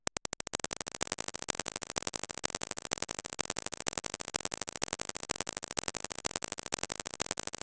electronic percussion delayed to emulate the sound of a marble dropping on a hard surface